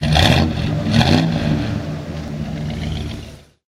Big Block V8 Rev
A Ford 460 V8 engine revving.
460, big-block, engine, exhaust, Ford, rev, V8